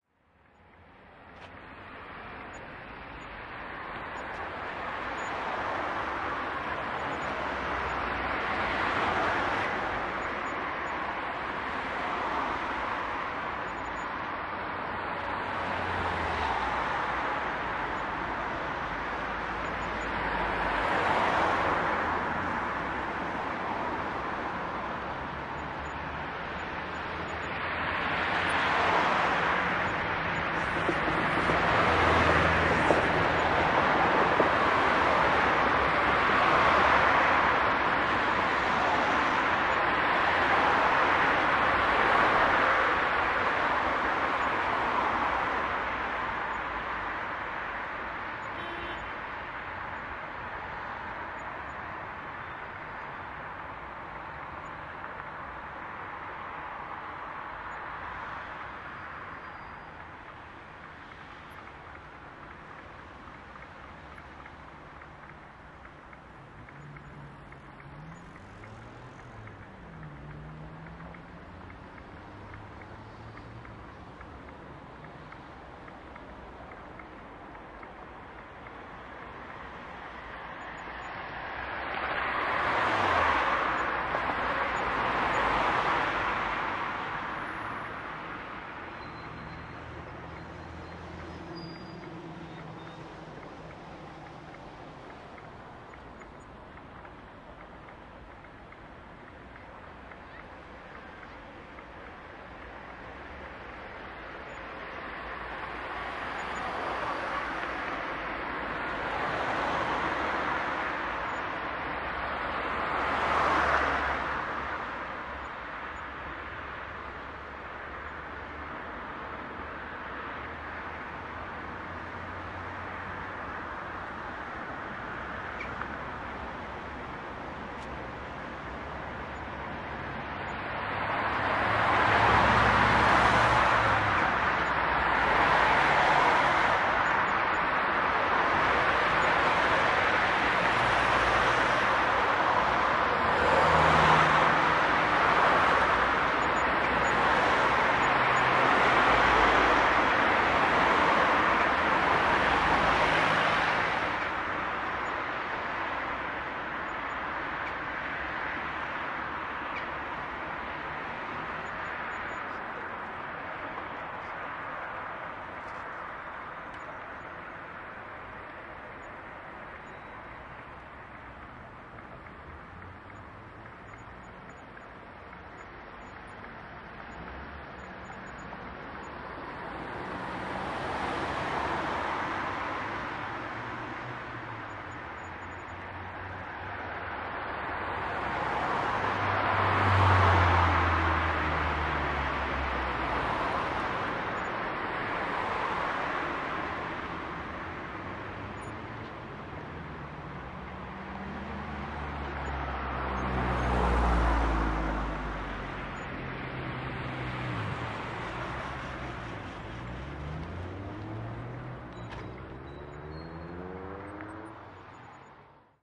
hi-fi szczepin 31082013 ambience of Legnicka street
31.08.2013: sounds of traffic on Legnicka street - one of the main roads in Szczepin district in Wroclaw.
maratntz pdm661mkII +shure vp88